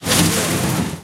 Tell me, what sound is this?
ences maraca1
can be used as a percussion maraca sound
field-recording, fireworks, light